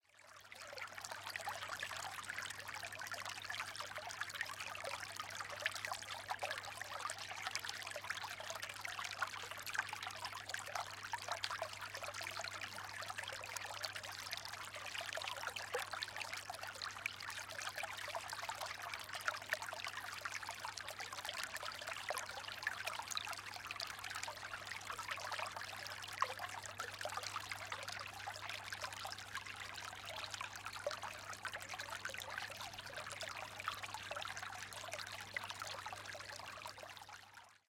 Water, small stream
The sound of a quiet flowing stream.
ambient, relaxing, field-recording, water, brook, ambience, trickle, flow, stream, flowing, quiet, babbling